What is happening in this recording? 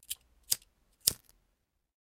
this is the sound of a (butane gas) lighter, with a crackling noise in the end.
gas, propane, fire, flame, flintstone, lighter